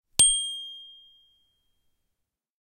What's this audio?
Sound of metallic bars of chimes on wood stand. Sound recorded with a ZOOM H4N Pro.
Son de trois lames métalliques d’un carillon sur un support en bois. Son enregistré avec un ZOOM H4N Pro.